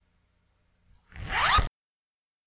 Sound clip of me at home "zipping up" a pencil bag. Recorded at normal speed with Conexant Smart Audio and Audio-technica AT2020 USB microphone, processed with Audacity.
bag, clothing, jacket, luggage, Zipper